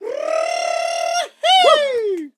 BRRRRR-HEY 01
This sample pack contains people making jolly noises for a "party track" which was part of a cheerful, upbeat record. Original tempo was 129BPM. This sample is the artist making a vocal modulation up to the phrase "Hey!"
vocals, vocal